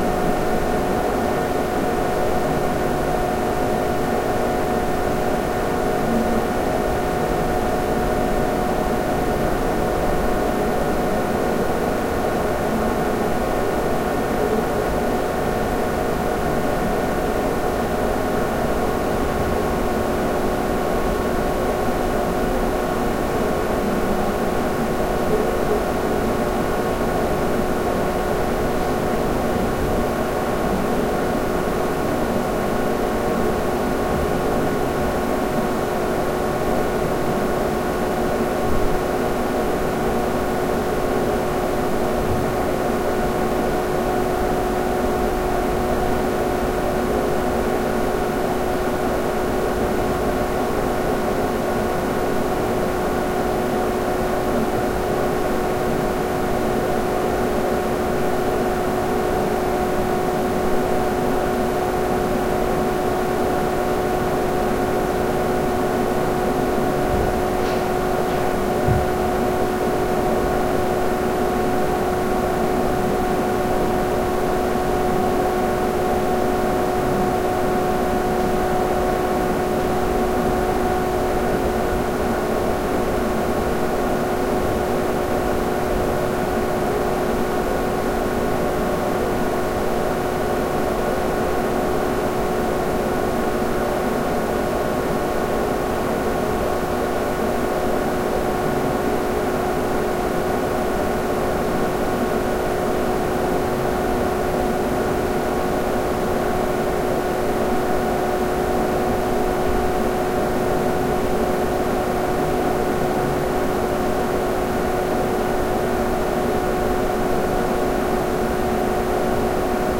computer fan
Sound of working desktop computer.
dr-100, tascam, indoor, computer, background-sound, fan